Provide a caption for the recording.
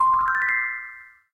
Up Chime 4
Part of a games notification pack for correct and incorrect actions or events within the game.
Thanks for stopping by!